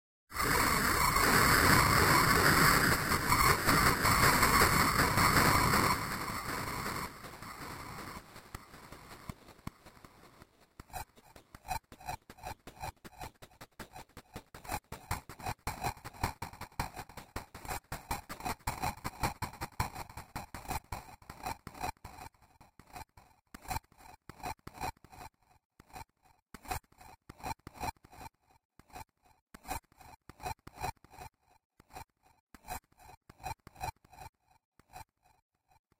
last seq
A remix of the flowerLoop:
A lot of flanger and phaser.Then I send the sound to a heavy distortion and a long delay.
atmospheric, effects, dark, fx, texture